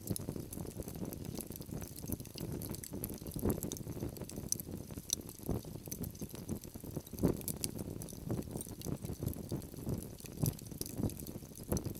Forge - Coal burning short
Coal burning is a forge, short.
crafts, coal, work, 80bpm, metalwork, forge, furnace, 4bar, tools, blacksmith, field-recording, labor, fireplace